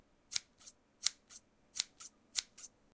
INT SCISSORS
cut some air with lite scissors.
flipping, scissors, air